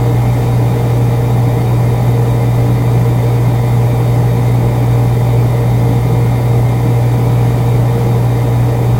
AMB-Fridge-Idle-01
The hum of a refrigerator.